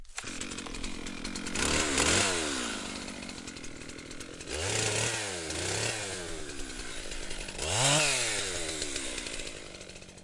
starting chainsaw 1
starting a chainsaw
chainsaw, motorsaege, starting